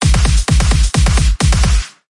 FL Funk
Cool Funky beat- can be looped. And I created this using FL Studio 12. could say the third in my FL Loop series. Also loops better when downloaded. Enjoy!